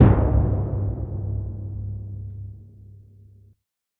One instrument I've always been very fond of is the timpani. However, I've only ever been able to use free samples.
The result: this, a most singularly powerful timpani. In my opinion the results are most pleasing and I doubt one can find a timpani with more power and force then this for free.
drums, percussion, timpani